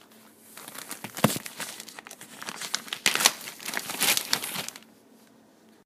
Opening ziplock bag